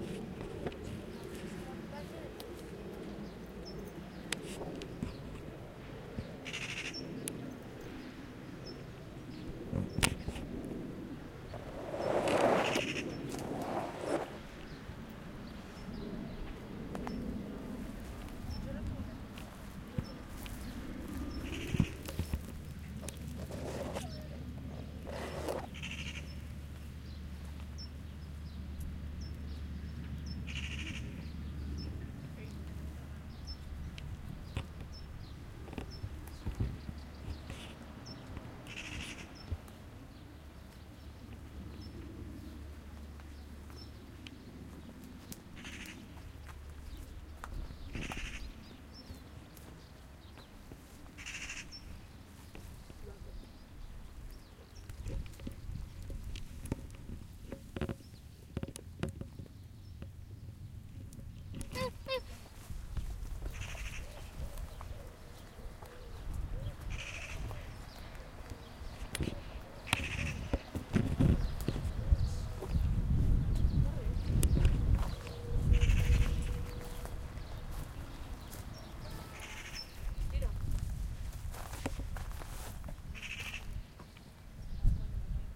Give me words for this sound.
collab-20220426 RondaGuineueta Forest Birds Wind Humans
Urban Ambience Recording in collab with La Guineueta High School, Barcelona, April-May 2022. Using a Zoom H-1 Recorder.
Birds, Humans, Forest, Wind